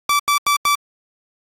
Alarm clock beep
alarm, beep, clock